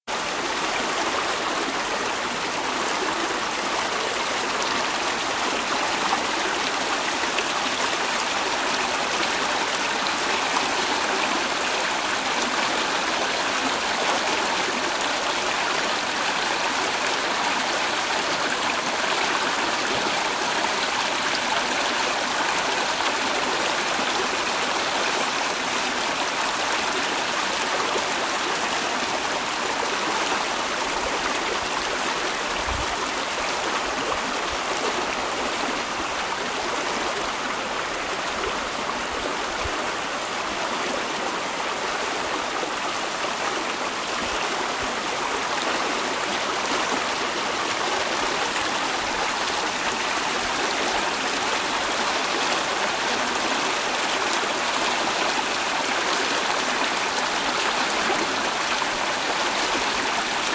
Creek - Moderate Flow
Moderately flowing stream - Jack Creek in Central Oregon's Cascade Mountains flows out of the ground at the base of Three Fingered Jack mountain. The water comes from a glacier on the mountain a couple of miles from where multiple springs create the large Creek bubbling up from the dry ground. This recording was done several hundred feet downstream where the Creek was already about 50 wide and only about a foot deep. Here's a picture taken just upstream from the sound location, near where the creek flows from the ground.
brook, creek, field-recording, flowing, nature, river, stream, water